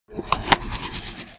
FOUCHER Simon 2014 2015 bittenApple
HOW I DID IT?
Sound recorded of bitten apple - With dynamical microphone
Using Audacity : Amplify: 8.0 dB
HOW CAN I DESCRIBE IT? (French)----------------------
◊ Typologie du son (selon Pierre Schaeffer) :
X' (Impulsion complexe)
◊ Morphologie du son (selon Pierre Schaeffer) :
1- MASSE :
Groupe nodal
2- TIMBRE HARMONIQUE :
Timbre harmonique terne et pauvre.
3- GRAIN :
Grain de « frottement » sec et granuleux.
4- ALLURE :
Aucun vibrato, aucun trémolo.
5- DYNAMIQUE :
Attaque abrupte mais non violente, relâchement graduel.
6- PROFIL MELODIQUE :
Variations serpentines.
7- PROFIL DE MASSE :
Site :
Une seule strate de son
Calibre :
RAS